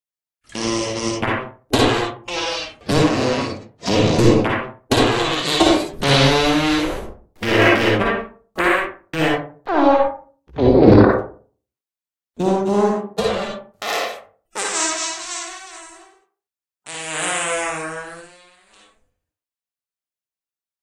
HAPPY BIRTHDAY REMASTERED
fart, flatulence, happy-birthday, comedy